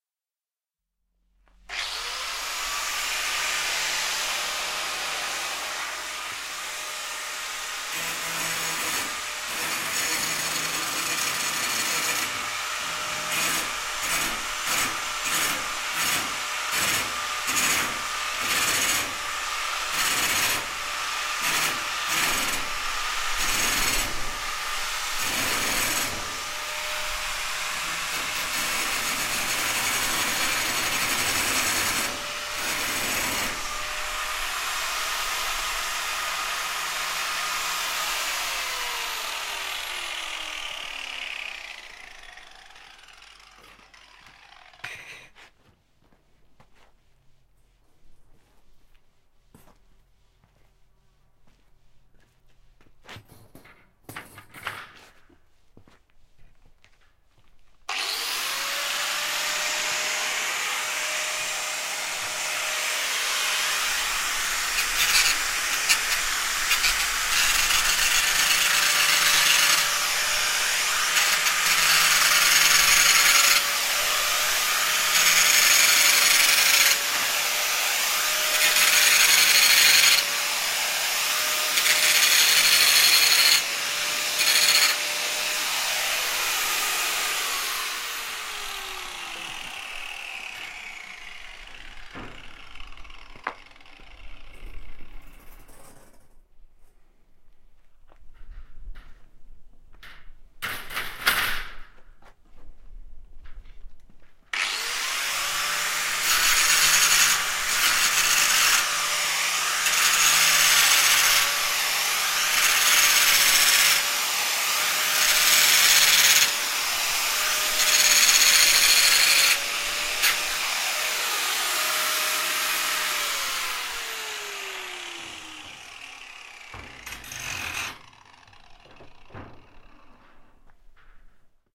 A mono field-recording of an angle grinder grinding steel weldmesh on a wooden bench initially, then on a concrete floor. Rode NTG-2 > FEL battery pre-amp > Zoom H2 line in.